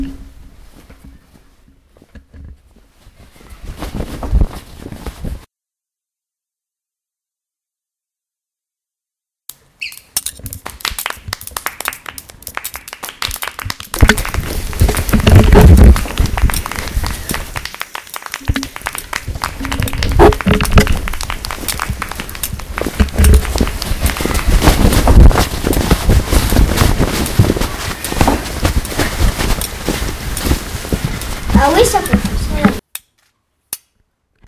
sonicpostcards-SGFR-gabin,elouan
sonicpostcards produced by the students of Saint-Guinoux